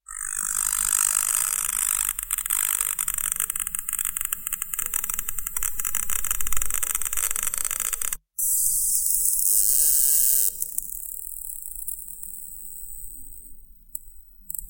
An air ionizer working.
Recorded by Sony Xperia C5305.